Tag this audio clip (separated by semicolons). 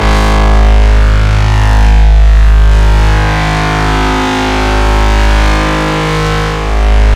harsh; bass; reece; driven; heavy; drum-n-bass